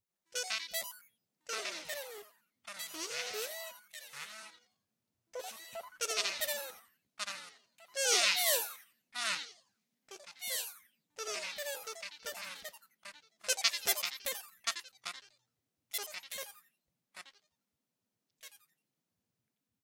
Recording of a kazoo with delay added. Sounds like droid conversation.